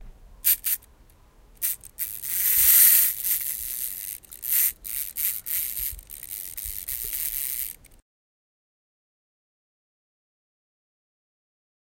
WIND-UP TOY 01
wind up a wind up toy. Zoom H1 recorder
toy, wind-up